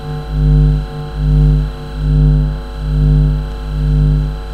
50Hz hum somehow modulating plus high frequency from a nearby room temperature control device
equipment: EM scanner, coil, Zoom H4
recorded in Dortmund at the workshop "demons in the aether" about using electromagnetic phenomena in art. 9. - 11.
50hz, noise, bass, tremolo, hum